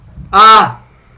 Short scream. AAA